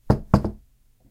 putting minibottle
bottle, down, put, putting